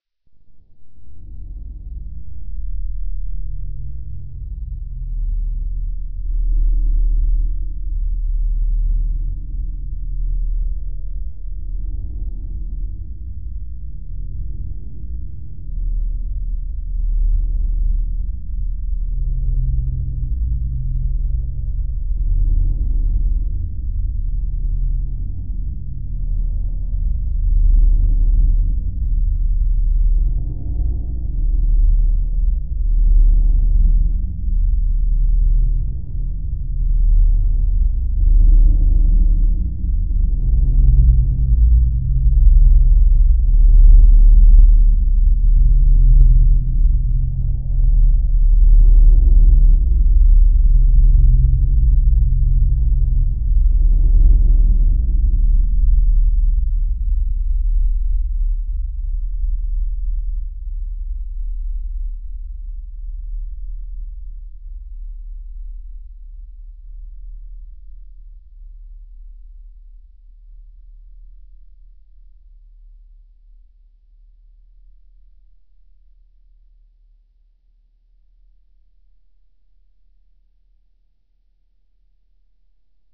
Big Room Ambience 3
This is the sound of someone playing a bongo drum. I added reverb to it and slowed it down about -81 percent, but of course, you already know about that. Made with Audacity and someone playing a bongo drum.